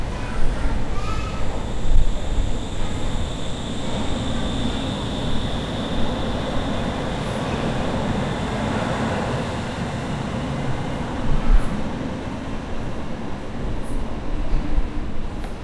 sounds at a subway station